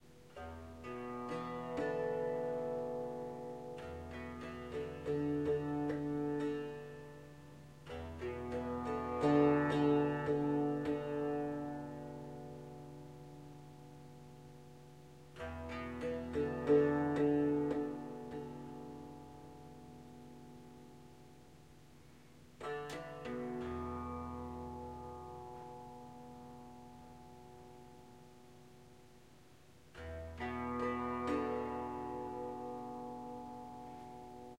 Tanpura Slow plucking and gentle slap bass E minor
Snippets from recordings of me playing the tanpura.
The strings are tuned to B, D, G and E, so would work well in the keys of G or E minor.
I noticed that my first pack of tanpura samples has a bit of fuzzy white noise so in this pack I have equalized - I reduced all the very high frequencies which got rid of most of the white noise without affecting the low frequency sounds of the tanpura itself.
Please note this is the tanpura part of an instrument called the Swar Sangam which combines the Swarmandal (Indian Harp) and the Tanpura, it is not a traditional tanpura and does sound slightly different.
ethnic, indian, bass, tanpura, tanpuri, swar-sangam, tanbura